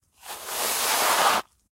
Pushing short
moving, pushing